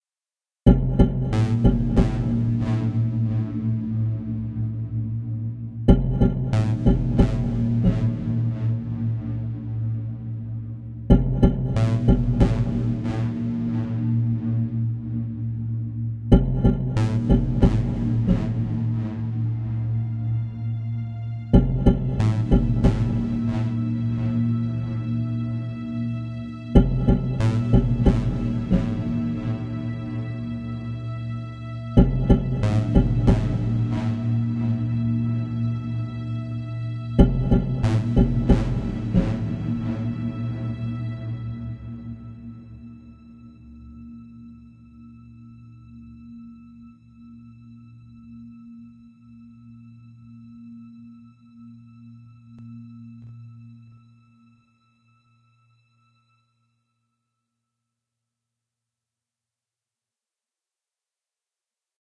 freaky news
A musical scene supporting suspenseful moments
weird, psycho, freak, suspense, science-fiction, dark, music, crazy, freaky, horror, space, sci-fi